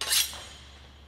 Sword Slice 06
Sixth recording of sword in large enclosed space slicing through body or against another metal weapon.
foley, sword-slash, sword, movie